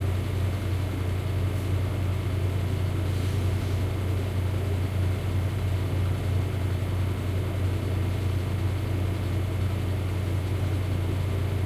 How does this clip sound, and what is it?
Have you ever been inside a computer room, where a few computers are turned on?
This sound clip is meant to be the kind of sound you'd hear in such a room.
This sound was made using my own computer, but also my fridge and the fan in my bathroom.
computer, layered-recording, server, loop